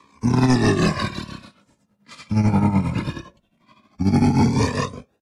Angry Growl
I processed my voice. Used it for voicing angry attacking robot.